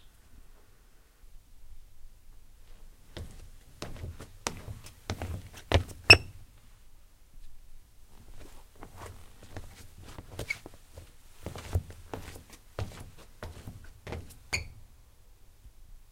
Squeaky trainers on a wooden floor
SofT Hear the Quality